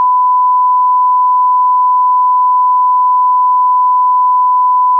This is a 5 second rendering of the famous 1000Hz Censoring Tone used on TV, Movies and broadcasts.
It can be cut, looped and used to censor audio that may be sensitive in nature or otherwise not fit for broadcasting.
Made the tone using an analog tone/pulse generator fed into a Focusrite Scarlet 2i4.
The sound is very clean (no noise).